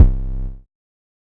C Kicks - Semi Long C Kick
Square wave > Filter > Pitch Mod > Hard Compression for Transients
hard, Punch